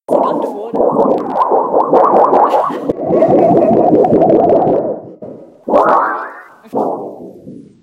Wobbly thundersheet effect.
effects, loud, round, rumble, sheet, sound, thunder, wobbly